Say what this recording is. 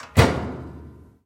Appliance-Washing Machine-Door-Close-01
The sound of a washing machine's door being closed. Despite it's big, boom-y sound the door isn't being slammed shut.